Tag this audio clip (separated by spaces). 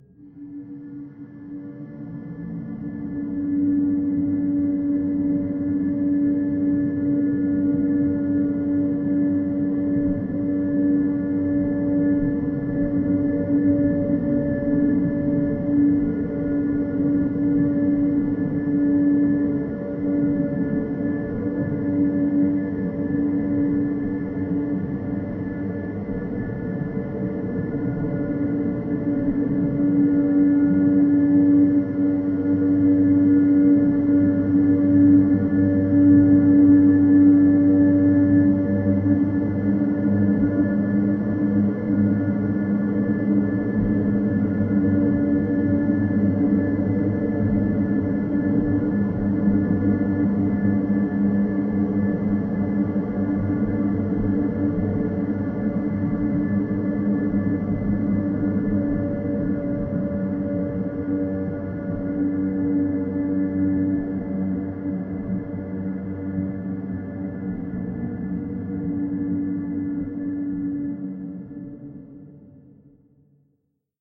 background drone industrial multisample soundscape